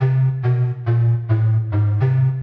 Pan Pipes 105
105bpm, pan-pipes, sample